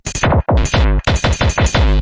hello this is my TRACKER creation glitchcore break and rhythm sound
noise, sci-fi, anarchy, breakcore, overcore, experymental, electronic, extremist, future, skrech, loop, soundeffect, glitch, lo-fi, digital, core, sound-design